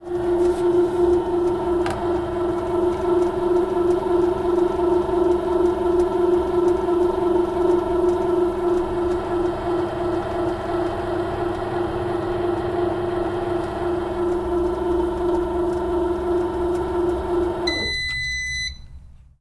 Servo 2 Panera
Sonido de movimiento robotico, sacado de una maquina de hacer pan.
Grabado con Roland R-05. (24-48)